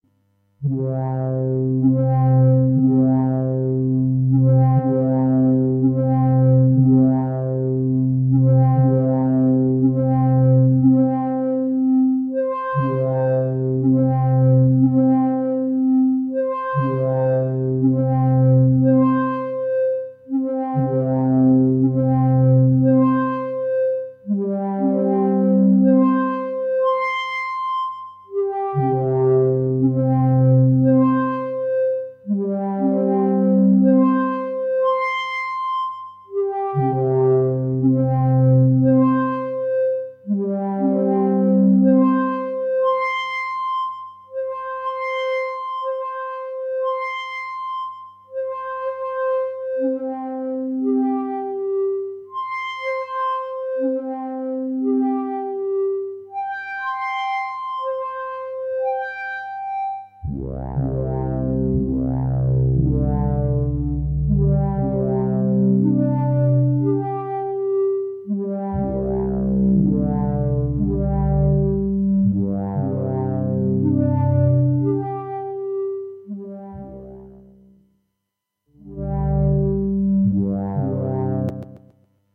Recurving Filter Arp
ambient, Blofeld, drone, eerie, evolving, experimental, pad, soundscape, space, wave, waves